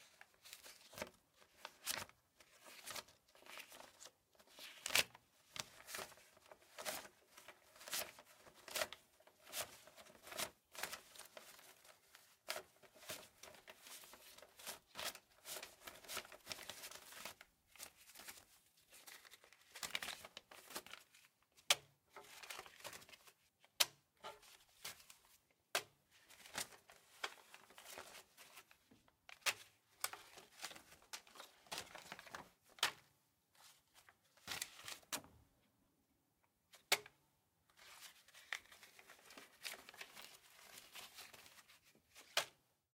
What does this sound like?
Sound of paper money being handled. Counting, handling, and tossing down a wad of cash. Recorded on a Sound Devices 744T with a Sanken CS-1 shotgun mic. Hope it works for you.
paper-money, Foley, wad-of-cash